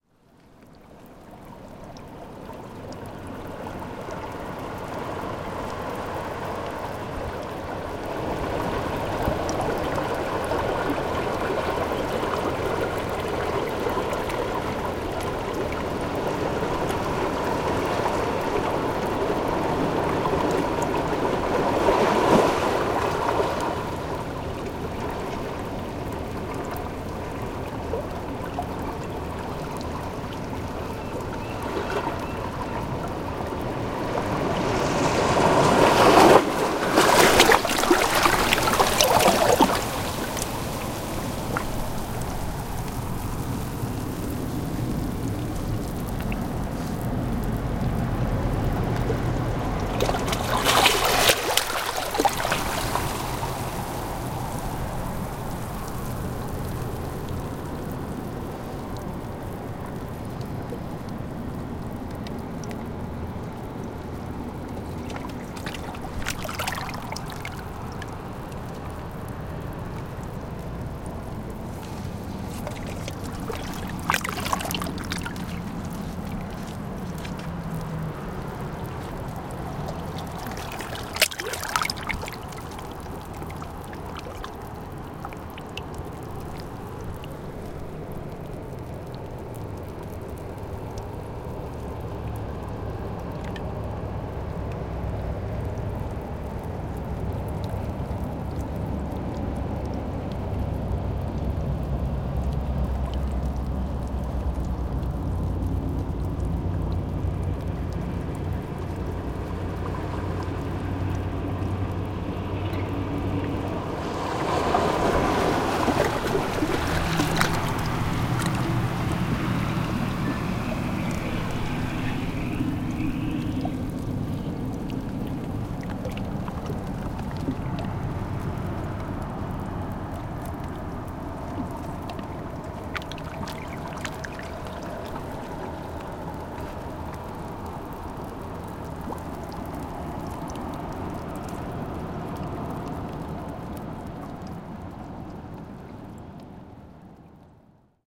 tidepool close
Close in recording of a small pocket of a tide pool. Waves & barnacles. Recorded w/ D-50 internals, in XY.
roar, close, ocean, beach, surf, field-recording, waves